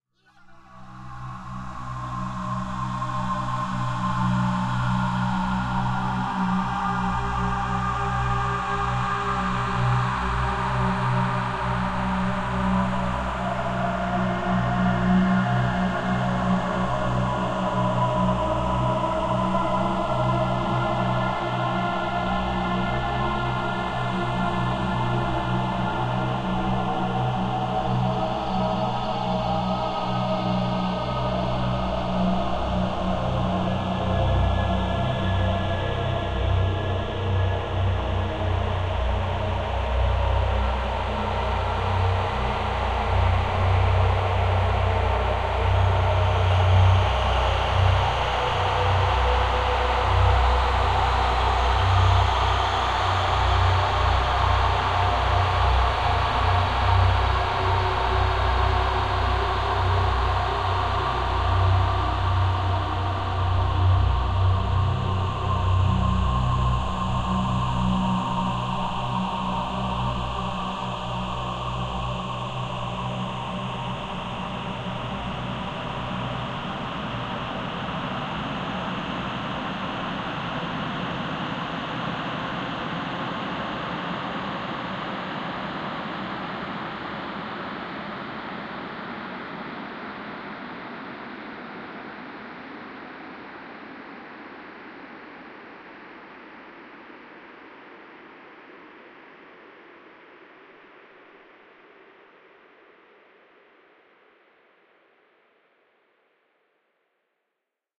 depths-of-hell
demons, ambience, hell, horror, ambiant, god, evil, angel, ambient, lucifer, demon, realm, ambiance, heaven, soundscape, spiritual, angelic, devil, spirit, satan